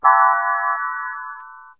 stockhausen reenactment, made in pd. The original was a fysical contruct, this was re-created in Pure Data